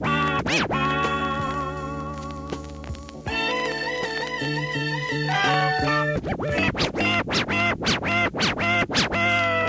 stuped scrach22
80, bpm, loop, record, scrach